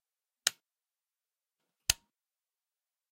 Immersion heater switch, on and off.
switches, electricity, heater, domesticclunk, off, immersion, switch, click, electric